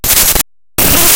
These Are Some Raw Data. Everyone Knows that Trick, Here's My take on it, Emulators (your Favorite old school RPG's), Open LSDJ in Audacity, Fun Fun.